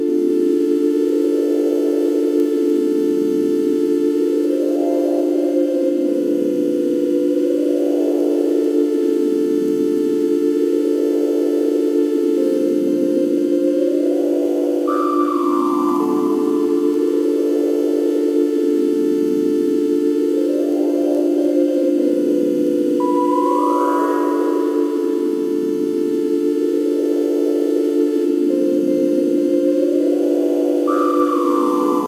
synth pad loop (d minor)
Synth Phrase in D minor.
Looped and used many times in live performance as a pad, kind of a drony texture with delays.
svayam
free, drone, vst, electronic, loop, synth, ambient, d-minor, sound, echo, progression, dela, low-frequency